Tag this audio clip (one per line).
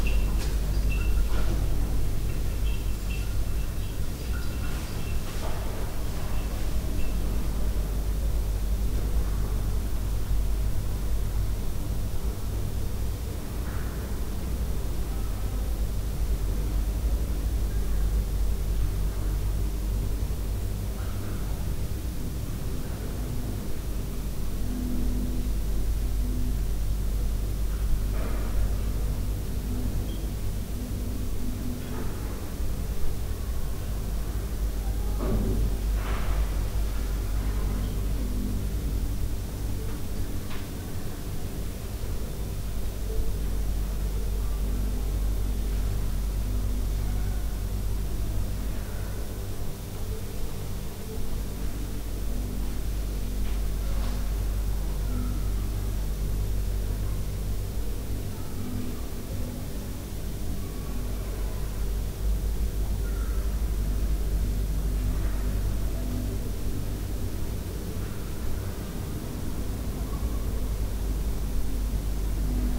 room
pipe
indoors
ambient
tone